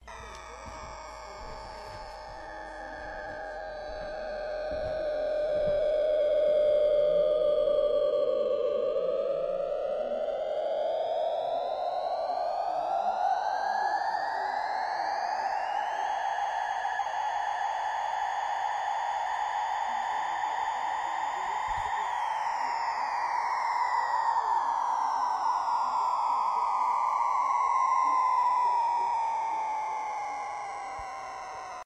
sci fi lab sounds